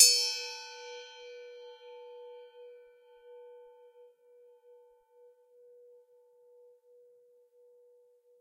A natural percussion sound.
bell, Percussion, Ogive, Drumstick, Gong, bing